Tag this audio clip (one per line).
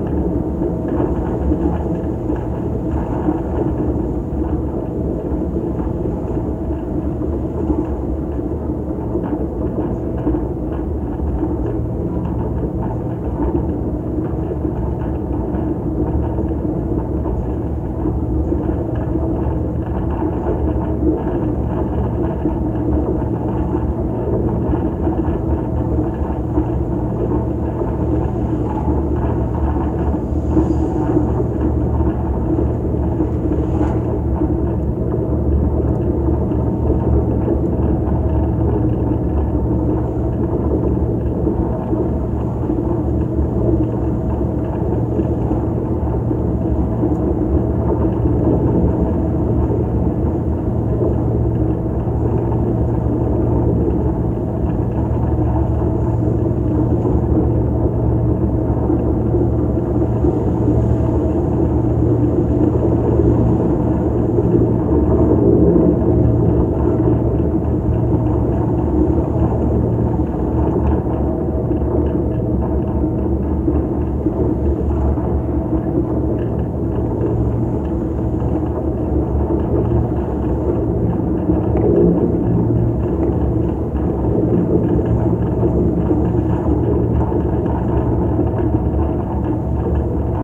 bridge
contact
contact-mic
contact-microphone
DYN-E-SET
field-recording
Golden-Gate-Bridge
microphone
Schertler
Sony-PCM-D50
wikiGong